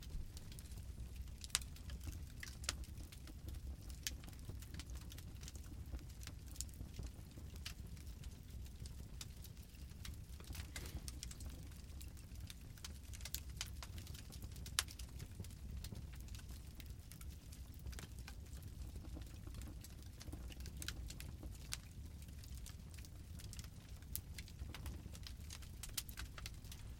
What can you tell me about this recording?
fire ambience, flames, crackles, pops, burning
Fire ambience sound, with some small crackles and pops throughout. Good for campfire or indoor fire sfx/ambience/tone.
Recorded with a Sennheiser MKH-50 into a Zoom F4 recorder.